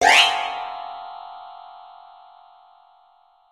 Sound made for jumpscare scenes in games, movies.
Made with Musescore